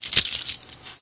Moviendo el arma
Audio, Tarea